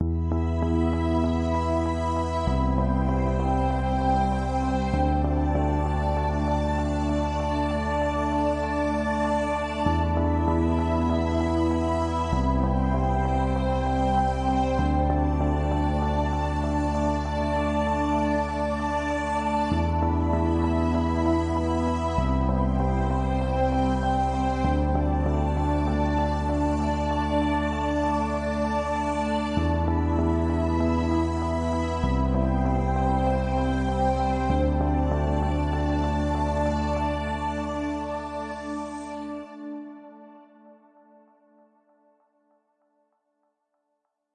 Created simple pad mix with my music production software.